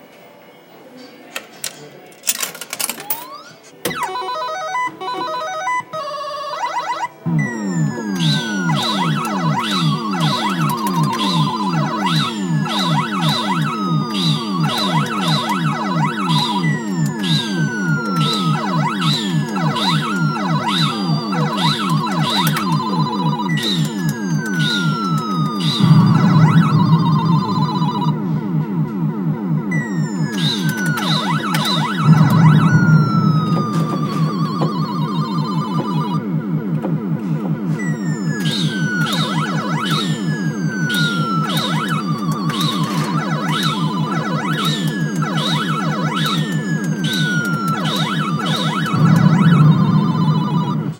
Galaxian Arcade Game
Sounds of Galaxian game.
UPD: changed the name, it was previously called Space Invaders by a mistake